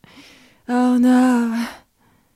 Female Voc txt Oh No
Short parts of never released songs.
If you want you are welcome to share the links to the tracks you used my samples in.
no, sing, song, voice, words